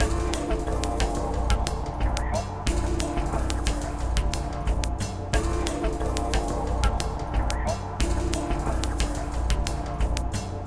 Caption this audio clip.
090 Procrustes rhythm 2 Am
Multi-layered rhythm loop at 90 bpm
atmospheric, percussion, processed, shuffling